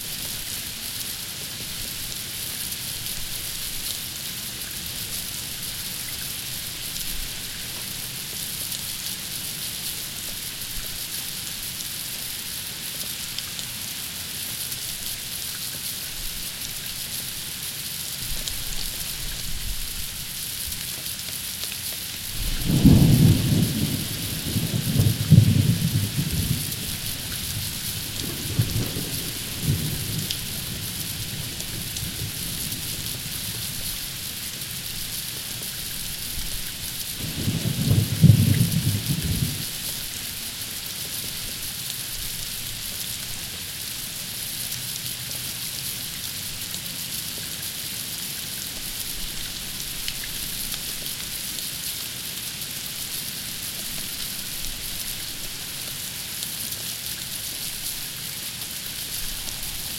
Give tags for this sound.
thunder water

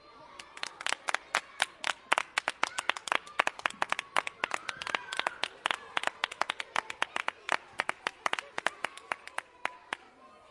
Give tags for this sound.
france march2015 messac

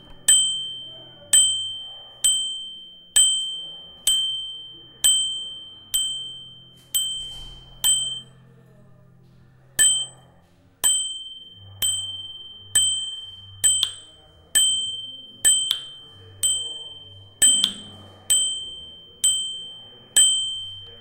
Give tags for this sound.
bell bicycle bike cycle horn mechanic metallic

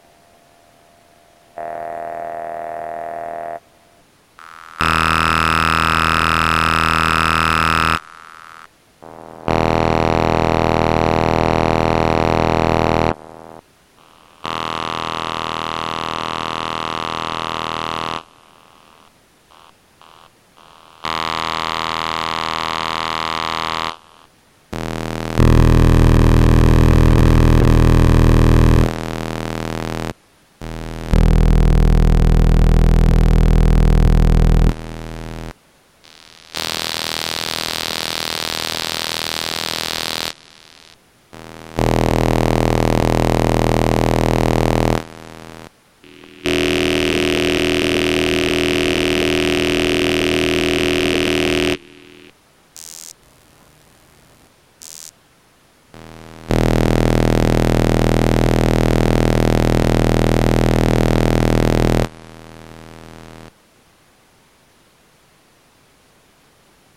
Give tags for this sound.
Kulturfabrik Synthesizer

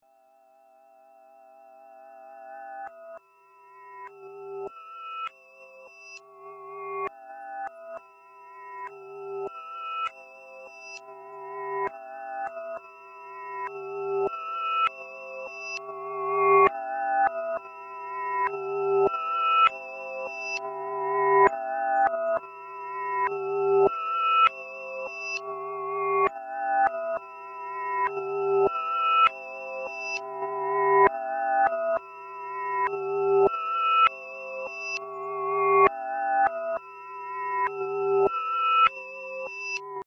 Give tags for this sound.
backwards bells stereo

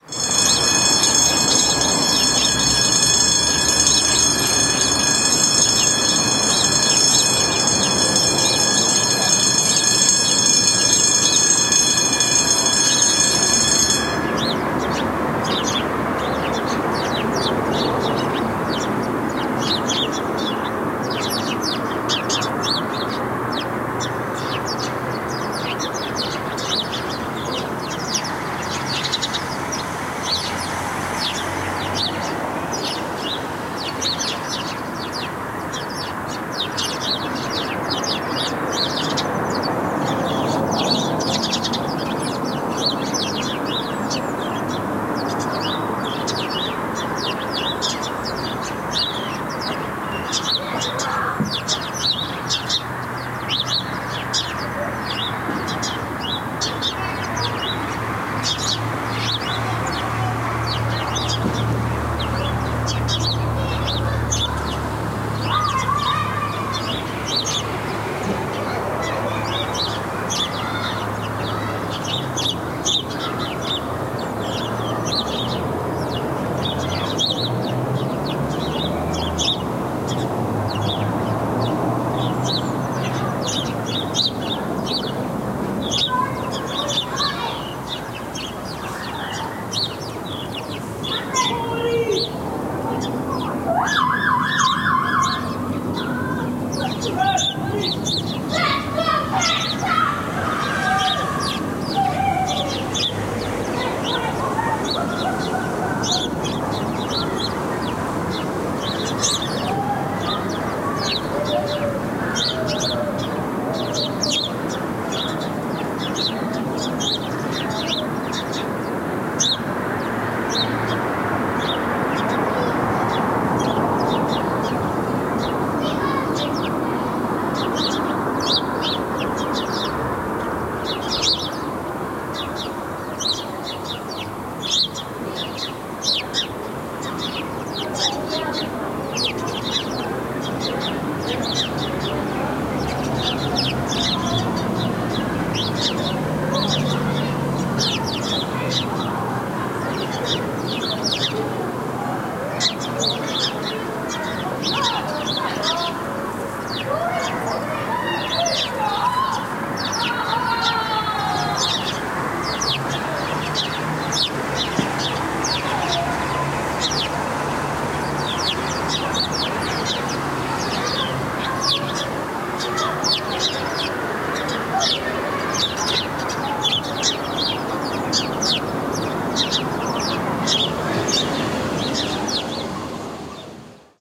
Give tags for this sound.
birds children field-recording knitting playground sparrows

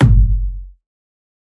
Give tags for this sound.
effected kick-drum bottle kick designed

